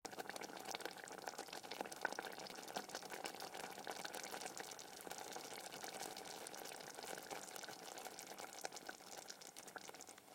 Thick Liquid Bubble
potion, boiling water. sound recorded is soup being heated
bubbles, bubbling, liquid, boil, water, soup, potion, bubble, boiling, chemical